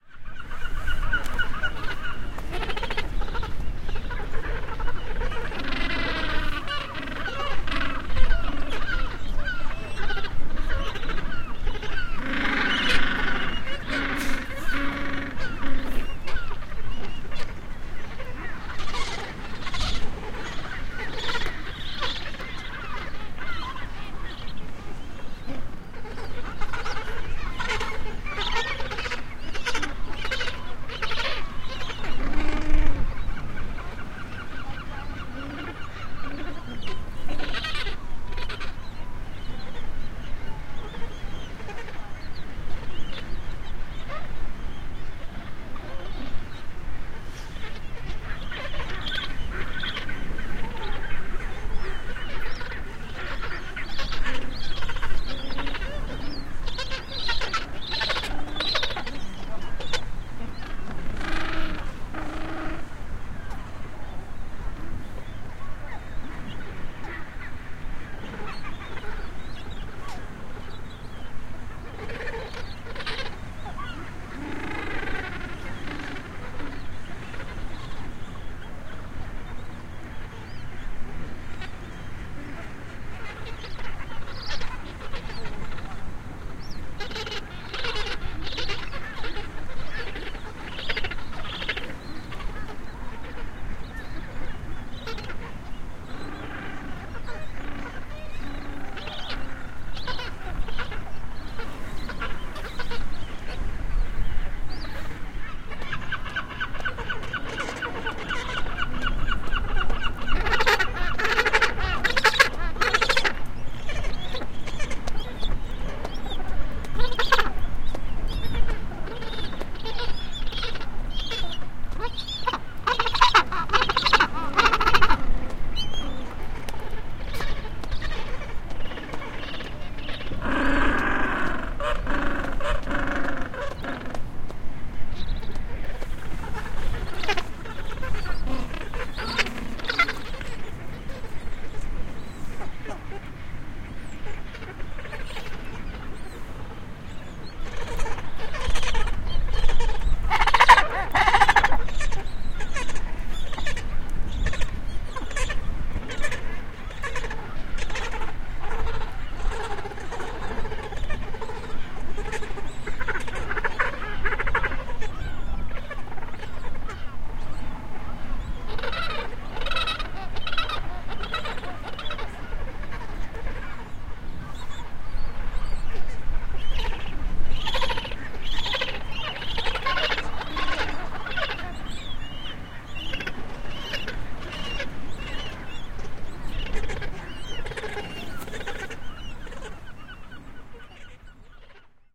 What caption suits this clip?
Penguin Colony at Danco Island at Antarctica Peninsula
Recording of a penguin Colony at Danco Island in Antarctica Peninsula, using a Shotgun Microphone (Schoeps)
Peninsula, Antarctica, field-recording, Penguin, Colony